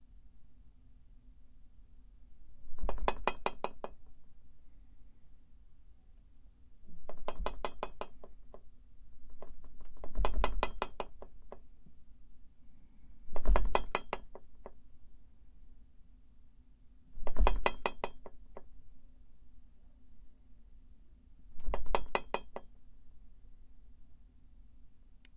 Some items on a dresser or table rattling after table is shaken